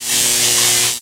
Power up sound created for a component of a game constructed in the IDGA 48 hour game making competition.